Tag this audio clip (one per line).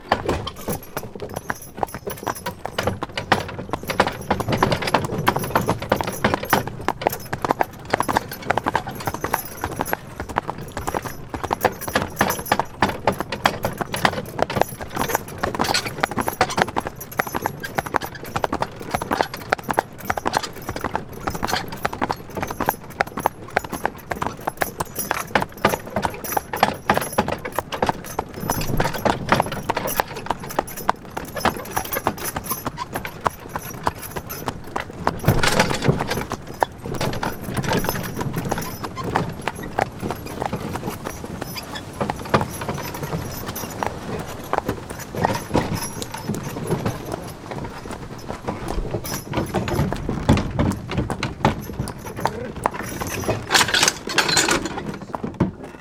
carriage
horse
wagon